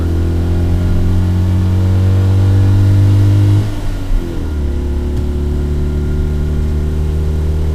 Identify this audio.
Short record of a 2CV. Speedup.